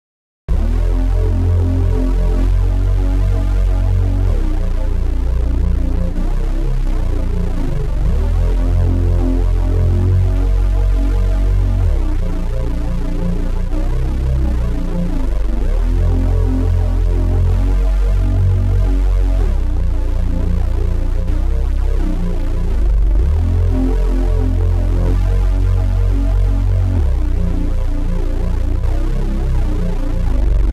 noise bass drone
Part of 7 sounds from Corona sound pack 01\2022. All sounds created using Novation Bass Station II, Roland System 1 and TC Electronics pedal chain.
Unfinished project that I don't have time for now, maybe someone else can love them, put them together with some sweet drums and cool fills, and most of all have a good time making music. <3
bass, drone, loud